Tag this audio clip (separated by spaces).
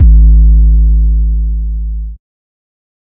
bass serum kick Sub vst 808